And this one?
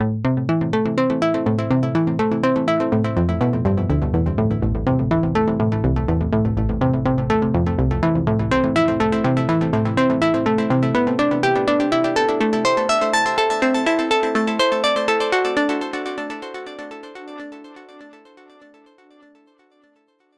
Find Me In The Sky Synth Loop
A slightly melancholy trance pluck synth loop with stereo delay written in minor.
Deep, Delay, Depth, Dream, Dreamy, EDM, Electro, Epic, Euro, House, Lead, Lead-Synth, Light, Loop, Minor, Open, Pluck, Pluck-Lead, Pluck-Synth, Plucky, Spacey, Stereo, Synth, Synth-Loop, Thick, Trance, Treble, Wide